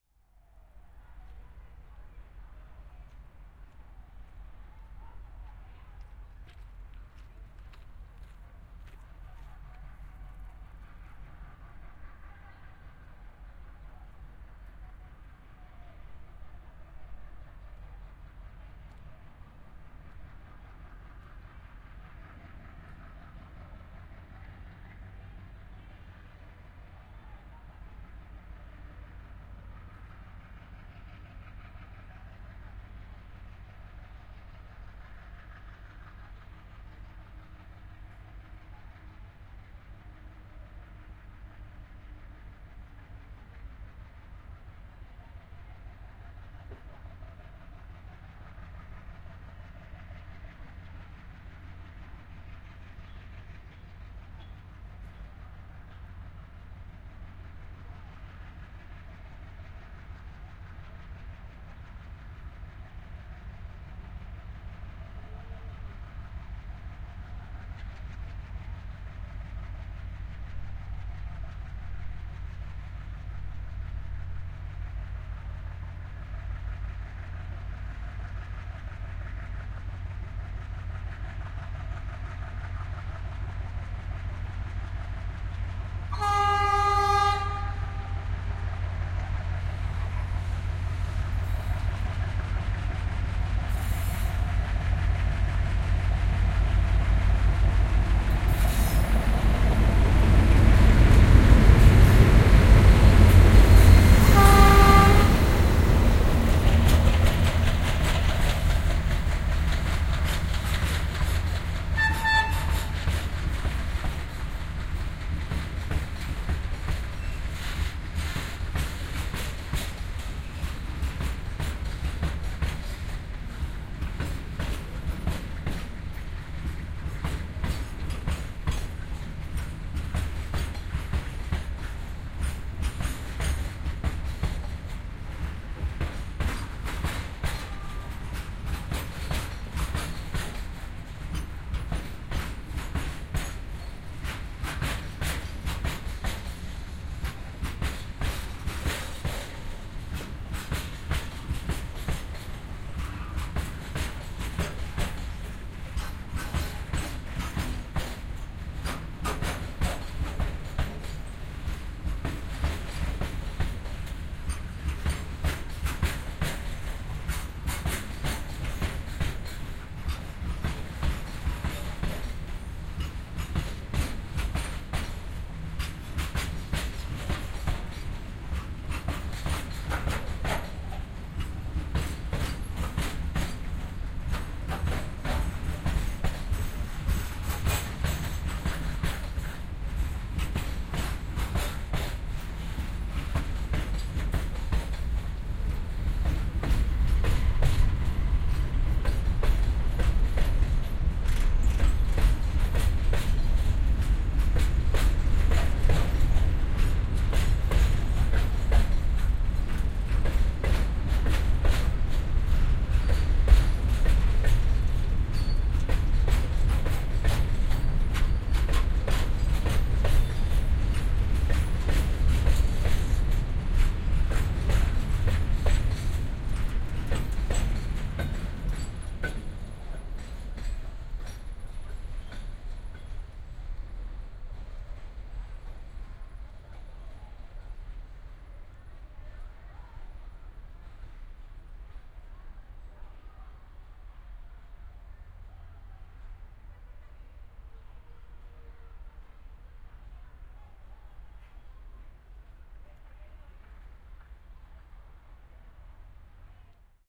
A Passengertrain passing Yelahanka Train Junktion, in Bangalore, India. I sitting like 2 meters away from the rails.